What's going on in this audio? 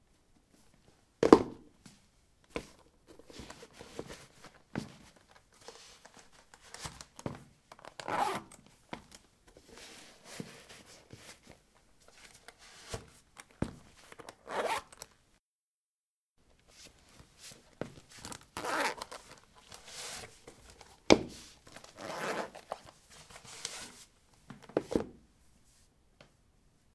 ceramic 17d boots onoff
Putting boots on/off on ceramic tiles. Recorded with a ZOOM H2 in a bathroom of a house, normalized with Audacity.
footstep, footsteps, steps